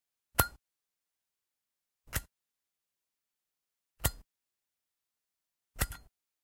Zippo Lighter
Here is the sound of a lighter clicking to ignite